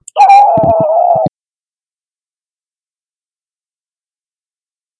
huhu huhu huhu hu